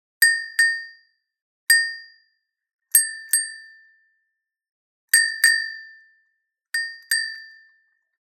small cowbell single hits.